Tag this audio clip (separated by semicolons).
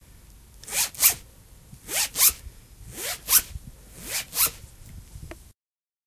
Garcia; music152